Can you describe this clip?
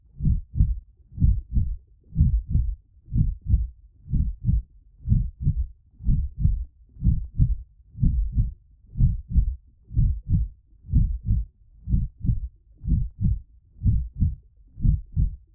The sound of a pulsating heart muscle or heart. Calm pulsation of the heart. Created artificially. Hope this will be helpful to you. Enjoy it!
Please, share links to your work where this sound was used.
Note: audio quality is always better when downloaded.